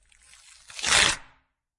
Ripping And Tearing Of Cardboard 1
One of the ripping sounds I recorded while disassembling some cardboard boxes. Very raw, just cleaned up in audacity.